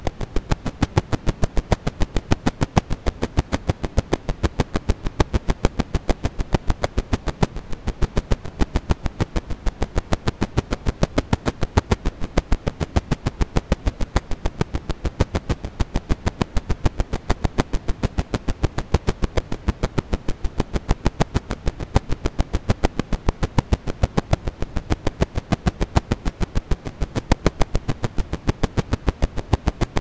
1900 33 rpm record crackle (high wear)
A record crackle I built in Audacity. The year and rpm are in the file name.
warp, rpm, record, wear, hiss, crackle, vinyl